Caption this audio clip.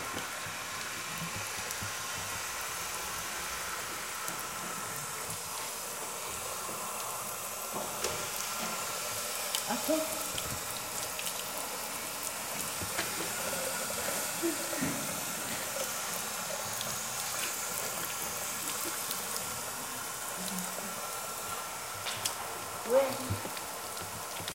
OM-Fr-tap
Ecole Olivier Métra, Paris. Field recordings made within the school grounds. Someone runs a tap.